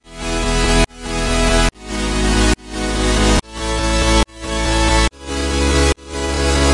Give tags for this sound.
142; bpm; fruity; loops; samples

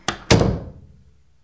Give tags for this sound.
open; closing; doors; opening; door; close

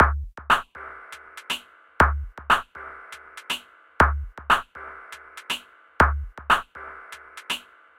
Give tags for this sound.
electronic; loop; percussion

